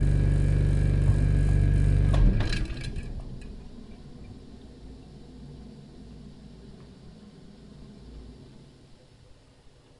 Refreg Stop
engine, electric, old, mechanix